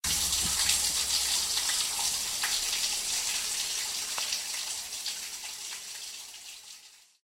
J10 water pump
water flushing threw a water pump
drain, pump, water